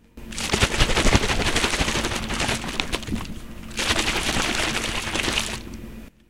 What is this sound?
water shake
water shaking inside a bottle.
bottle, shake, water